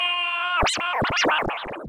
57921 Trance-Scratch
Kurtis-Blow-inspired scratching